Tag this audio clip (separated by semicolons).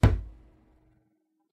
field-recording; smash; wood